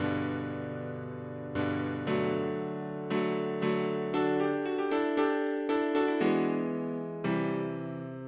On Rd piano loop 2 NO FX
16-bars, On-Rd, On-Road, chords, no-fx, no-mix, piano